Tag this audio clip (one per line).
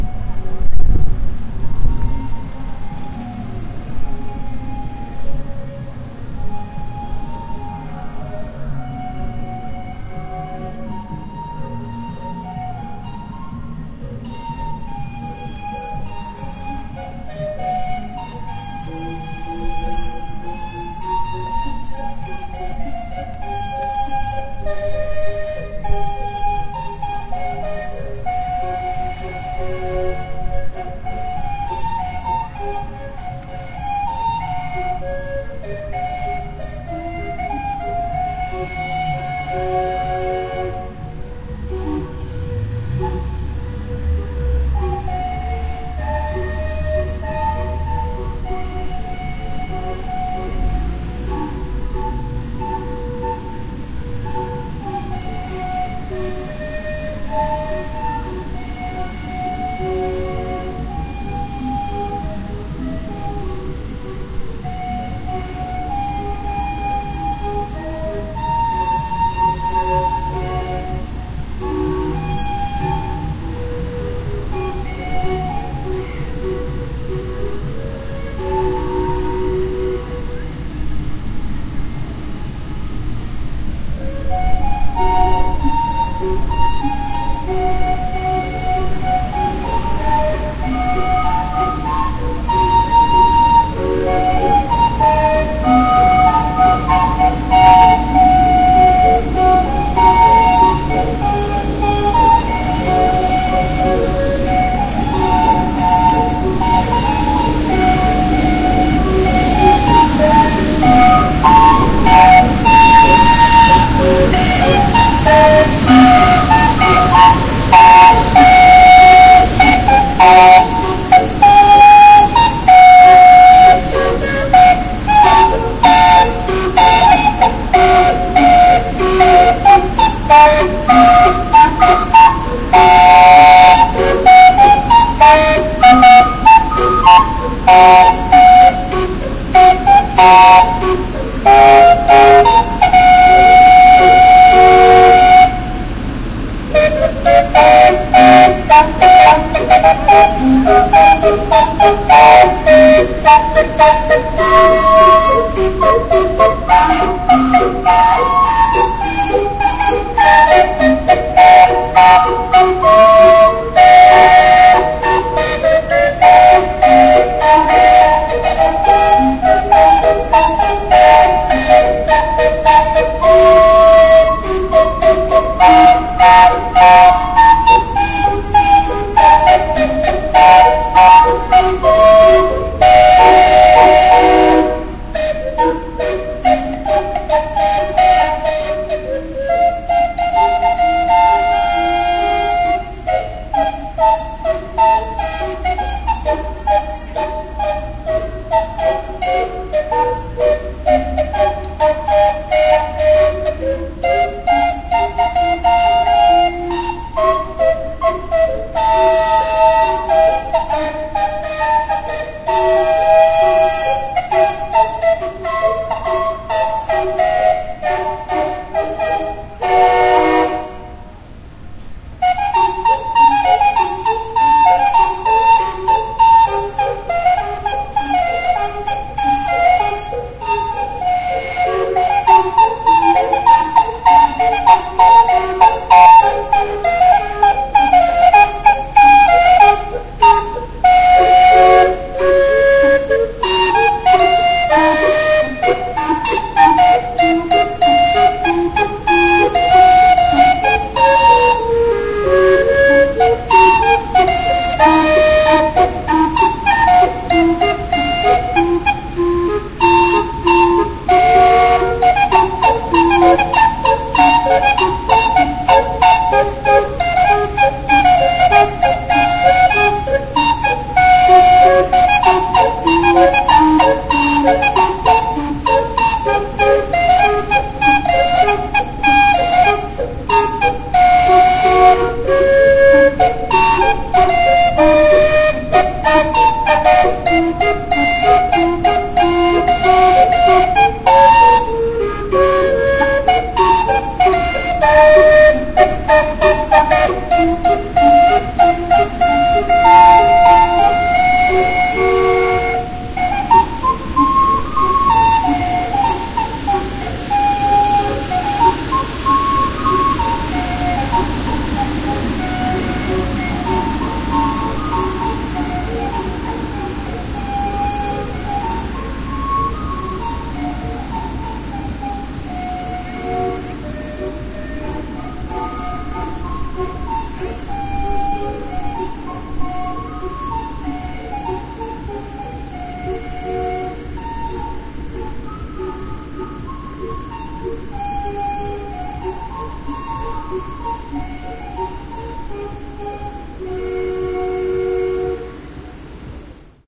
calliope
street
steam
ambience